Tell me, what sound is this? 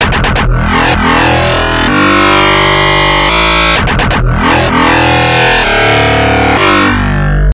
Sytrus Growl 1
A little growl made for an upcoming song, you can have this fo fweee!